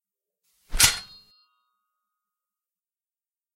Sword Slice
Recorded with Rode SE3
Layered metal scraping sounds from kitchen knives, pieces of sheet metal and replica swords along with vegetables for the gore impact.
Cut, Slice, Fight, Weapon, Sword